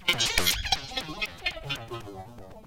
Same coil only recorded a little differently and then more heavily filtered.